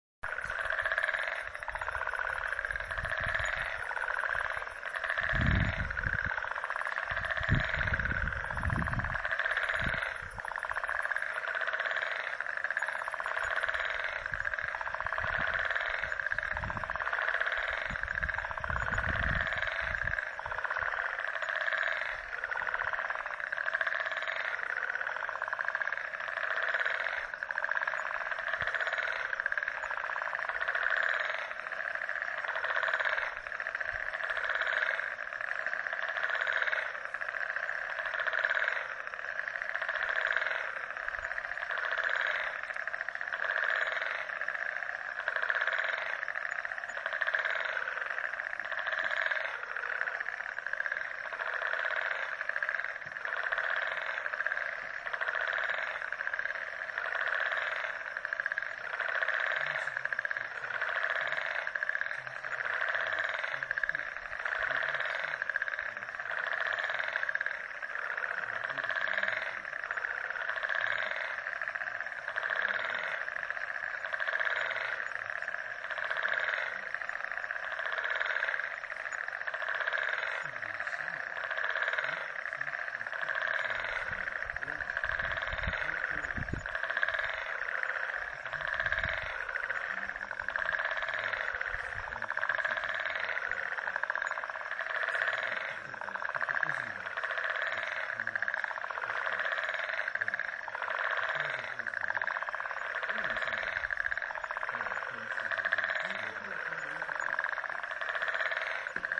Mauritius Frog Symphony
A symphony of frogs in August 2018 one spring evening in Mauritius.
Mauritius, Symphony, Night, Tropical, Island, Frogs